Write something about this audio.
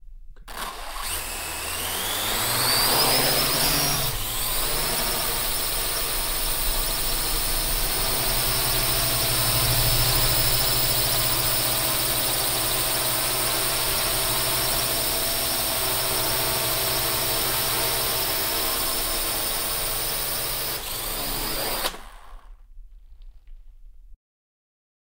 Quadrocopter recorded in a TV studio. Sennheiser MKH416 into Zoom H6.